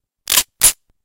Racking the slide on a .45 automatic. It may have been a Colt. May require some trimming and buffing. I think I recorded this with an AKG Perception 200 using Cool Edit -- and I did it to get my room-mate to leave (after we recorded the sounds of several of his guns and a sword .
45, racking, automatic